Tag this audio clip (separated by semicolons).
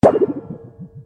Effect; Kick; FX; Synth; BASS; Studio; DEEP; Sound; ONE-SHOT; One; Dub; wobble; Percussion; Special; Shot; Sequence; FAT; Drum; sample